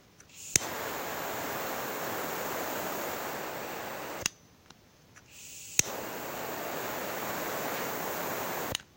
Small Blowtorch 2x 4sec